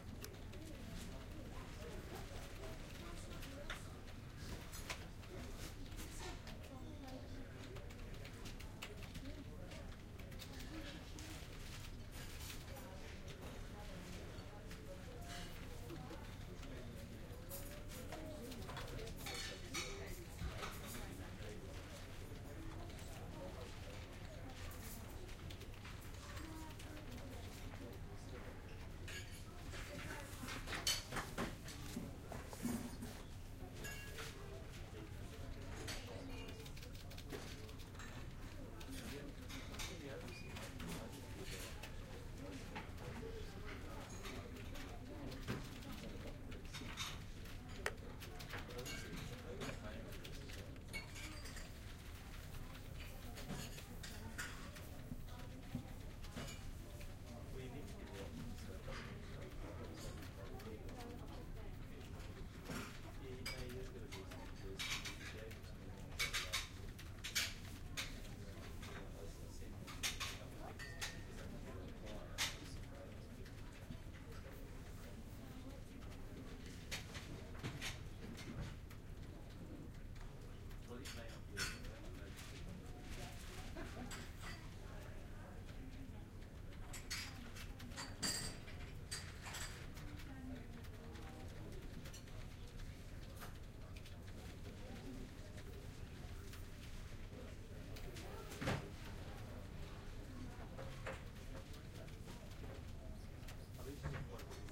Qantas Club Changi
Sound from the 'Qantas Club' (airline lounge) at Changi Airport (Termnial 1) - Singapore. This could be used as a quiet restaurant or coffee shop soundtrack (possibly internet cafe as you can hear some people using the internet terminals). There is some low-frequency rumble in this recording from the air-conditioning, can be removed with a high-pass filter if desired.
airport, business-lounge, changi, internet-cafe, qantas-club, resturant